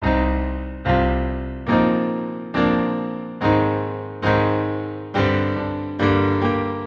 For use at your leisure. I make most of them at 140 bpm so hopefully one day they make their way into dubstep.
Chop/splice/dice/herbs and spice them, best served piping hot, enjoy.
Fondest regards,
Recorded with Logic Pro 9 using the EXS24 sampler of the steinway piano (Logic Pro default) with a touch of reverb to thicken out the sound.